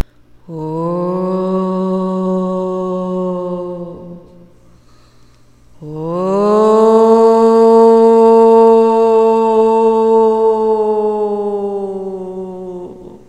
a base moan of a woman with echo done in audiocity erie horror effect